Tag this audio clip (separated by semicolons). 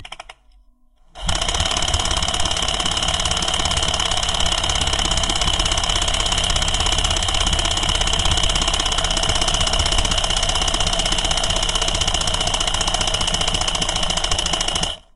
machine; mechanical; hand-drill; tools; sound-effects